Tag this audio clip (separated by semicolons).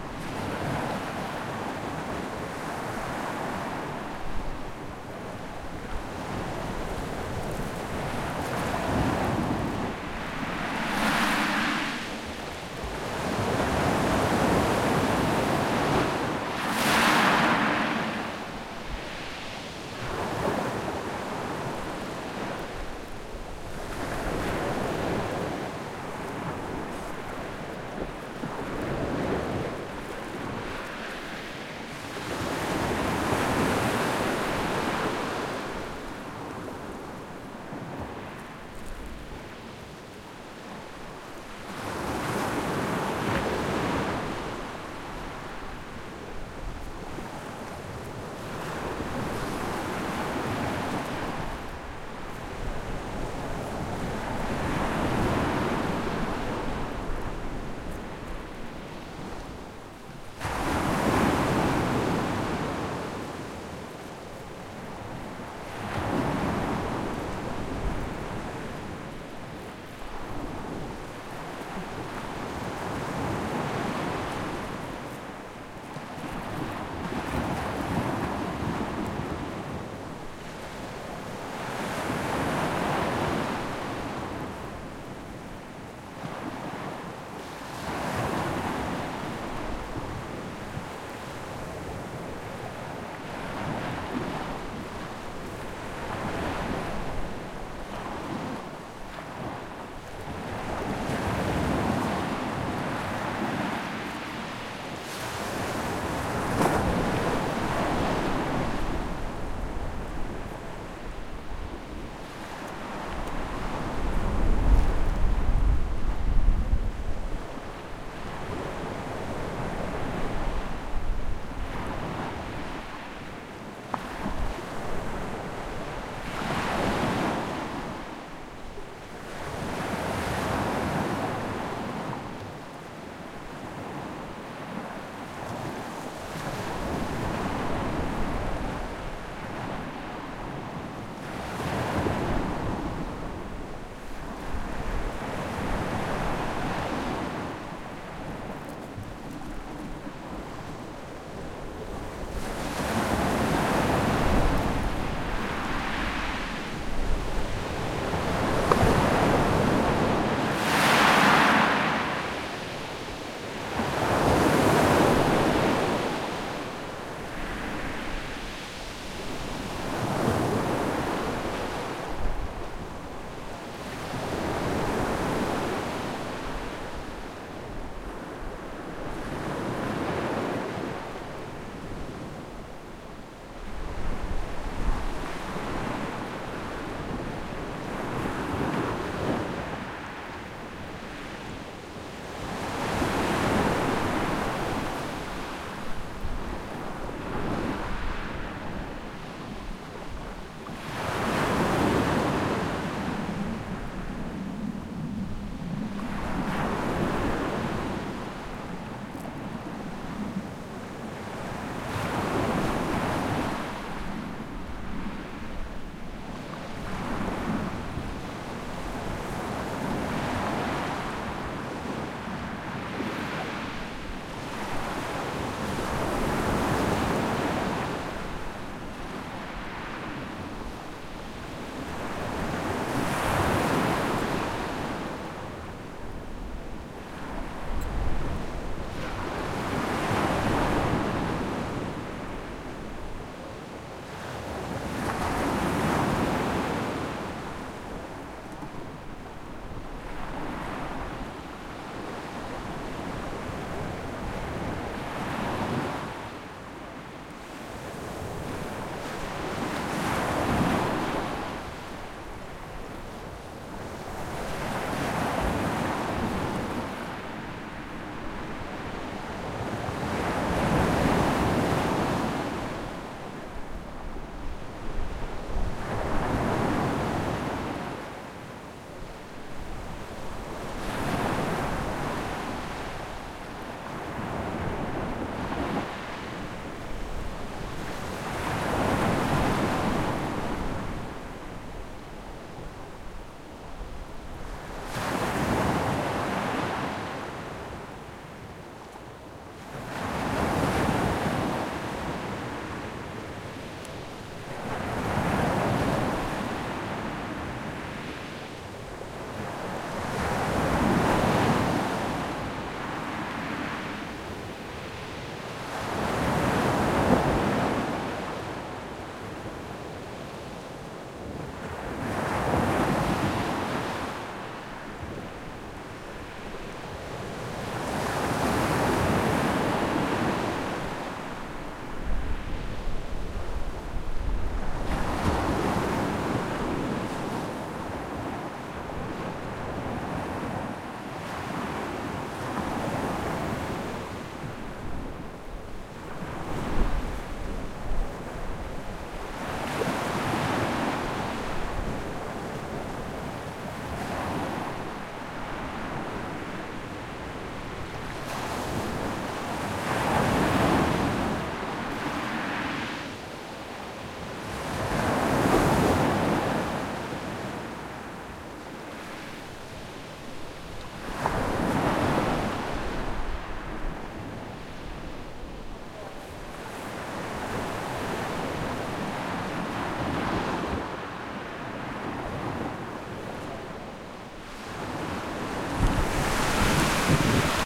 Ambience; AmetlladeMar; Beach; CalaXelin; Outdoor; Sea